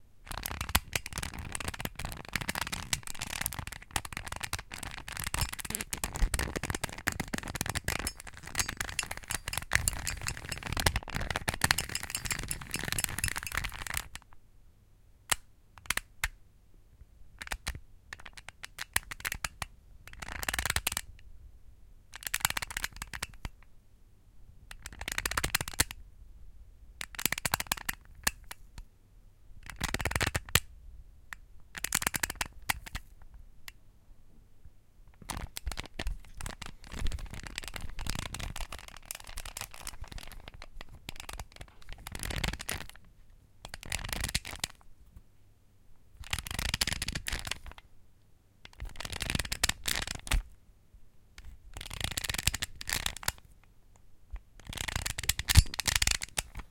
Rubik's cube: squashing and twisting pt.2
This is the recording of a Rubik's cube manipulation.
I recorded myself while squashing and twisting a Rubik's cube.
Typical plastic and metallic sounds.
Rubik, manipulation, Cube, squash, twist